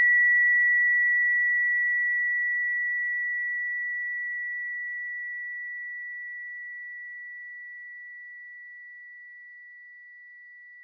A short sound of that sound, which you hear after an explosion. ( You can find an use for it)
- made with AUDACITY